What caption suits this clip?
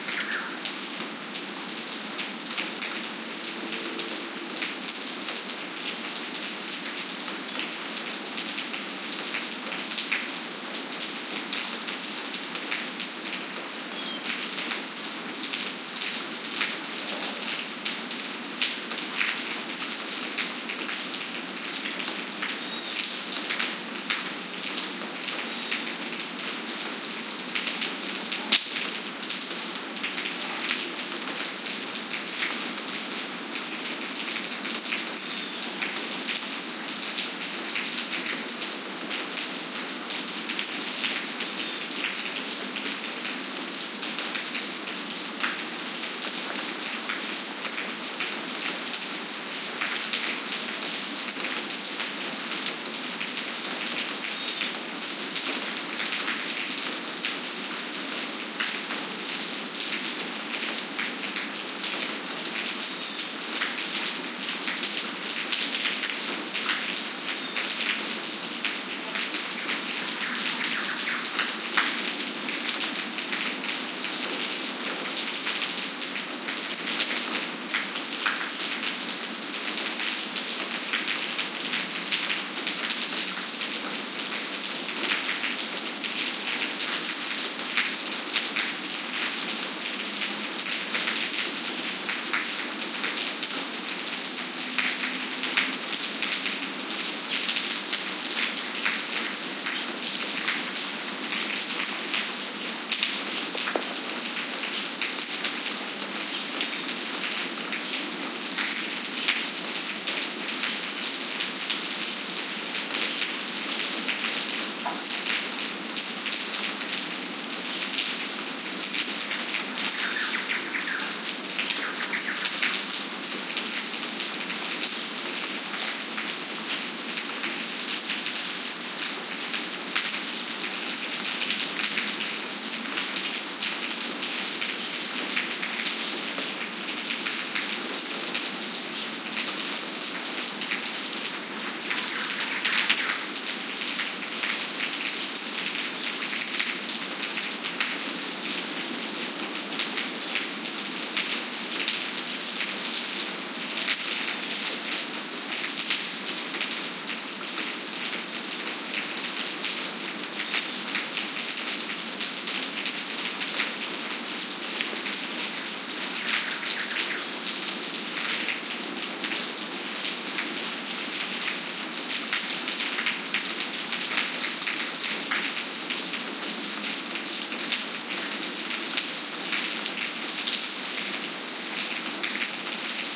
20160309 03.rain.n.birds
Noise of rain on pavement + bird callings. Soundman OKM capsules into FEL Microphone Amplifier BMA2, PCM-M10 recorder. Recorded near Puerto Iguazú (Misiones Argentina)
rain, birds, water, field-recording, forest